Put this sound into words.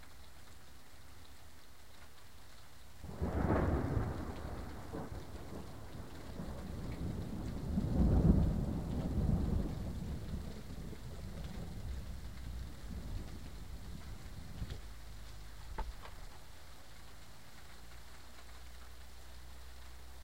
This thunder sound was recorded by my MP3 player in a morning storm on 19th of May, 2009. I only managed to record this one because the storm was gone quickly.